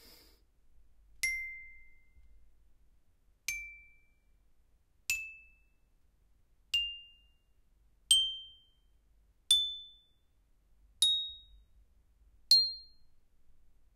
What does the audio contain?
Playing a toy xylophone
toy, xylophone